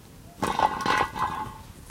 Rolling Can 27
Sounds made by rolling cans of various sizes and types along a concrete surface.